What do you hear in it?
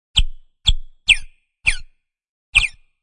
a bird chirping synth i created using GMS in FL Studio 12.
birdsong, synth